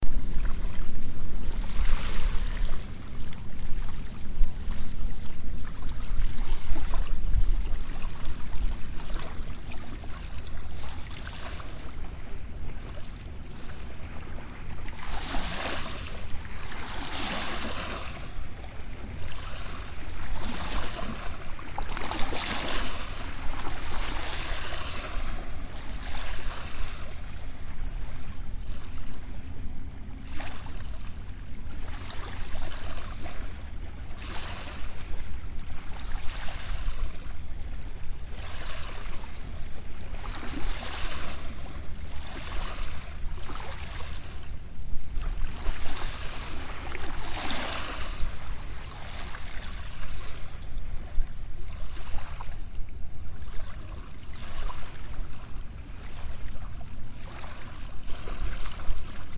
Mono recording of the Lake Ontario beach. Small waves. I'm curious!
ambiance, beach, field-recording, lake, lakeshore, shore, waves